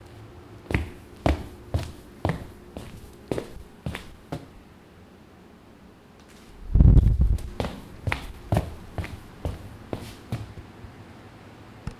walking on hardwood floors with shoes
walking, shoes, hardwood, footsteps